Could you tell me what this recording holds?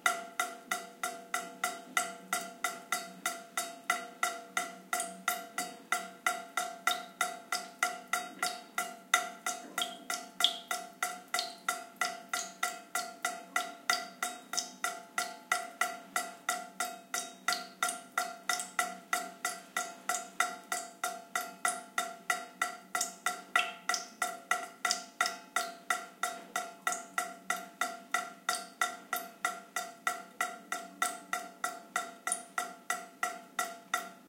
20110924 dripping.stereo.10
dripping sound. AT BP4025, Shure FP24 preamp, PCM M10 recorder
dampness, dripping, faucet, leak, rain, tap, water